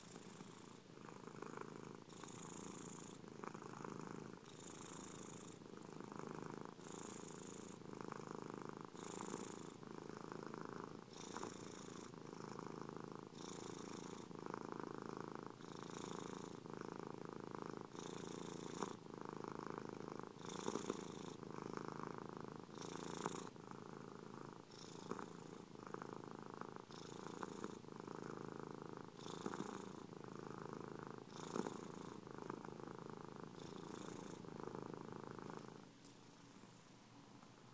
Purring cat on my sofa. Very deep.